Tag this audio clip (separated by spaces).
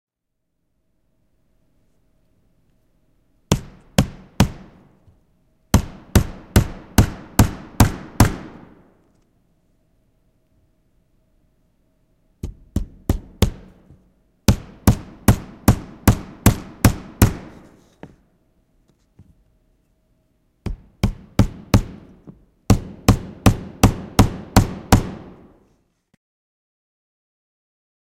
construction hammer household shop